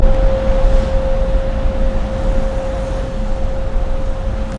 Some noise produced by machines on a construction site. Unprocessed field recording.
industrial, noise, machines